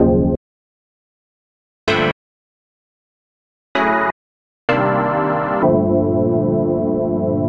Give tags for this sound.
chord; old-school; synth

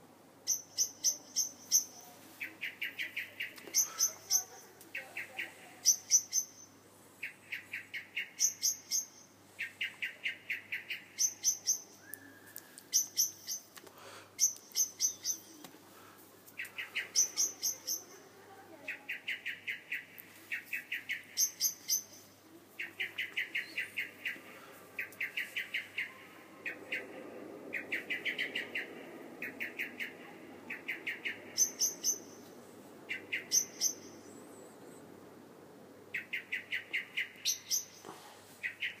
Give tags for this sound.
field-recording; spring; birdsong

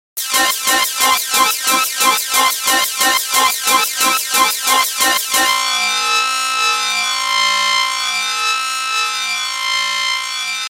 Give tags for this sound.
180; bpm; distorted; gabber; hard; hardcore; melodie; sound; synth

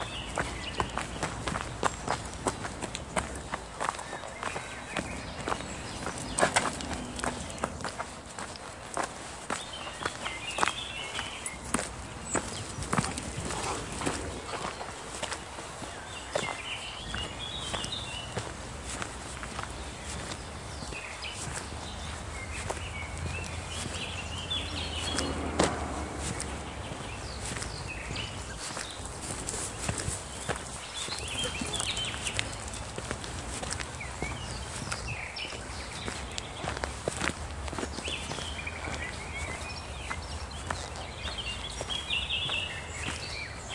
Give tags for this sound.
birds; forest; steps

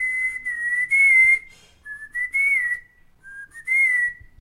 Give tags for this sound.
algo; silbando; silbido

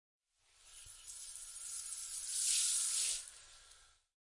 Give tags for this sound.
water; sea-spray; ship

